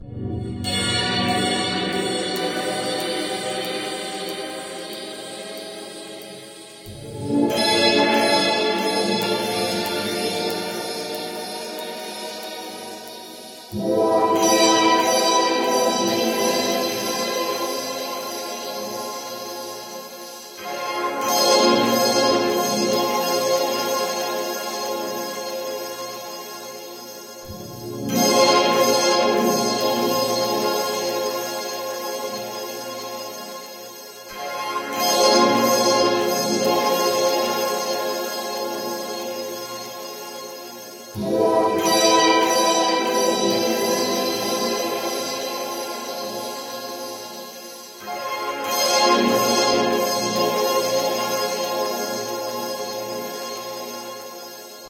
creepy backround noize with FX
backround, creepy, haloween, music, nozie